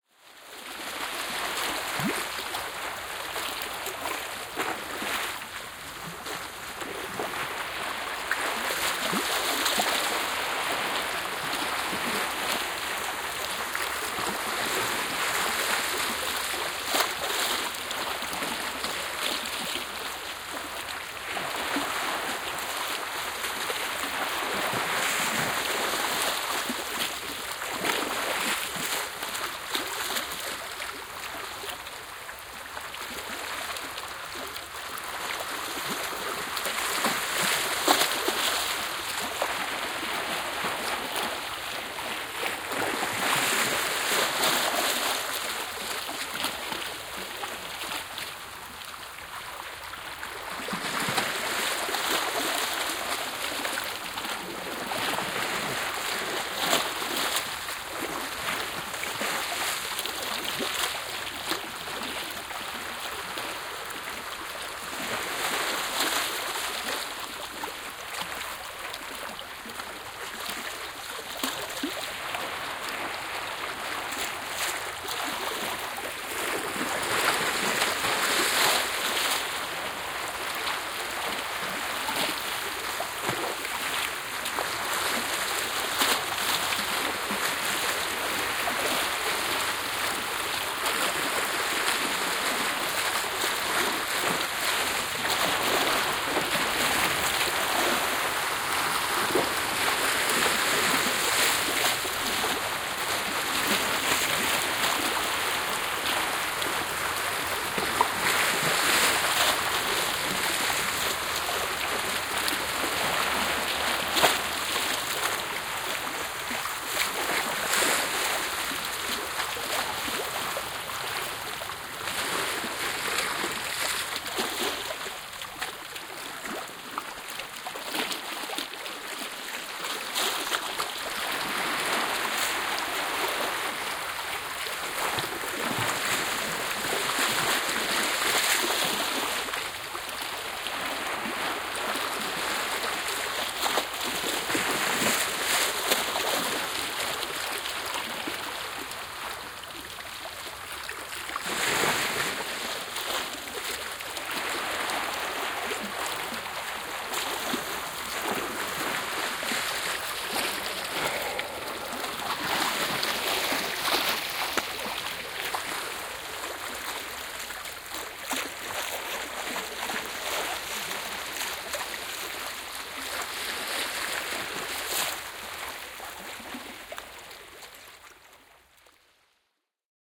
Binaural Waves splashes on rocks at Cap de l'Huerta, Spain
Recording made laying on the rocks, to get head quite close to the water.
This one is closer with more splashes
Recording equipment
Zoom H4n
Soundman OKM binaural mics.
splashes, sea, waves, binaural, nature, water